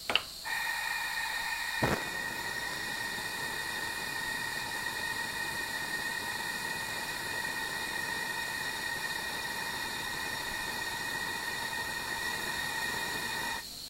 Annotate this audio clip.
gas stove 3
Mono recording of a gas stove burning.
Hissing sound from the gas flowing with a slight fluttering sound after it ignites.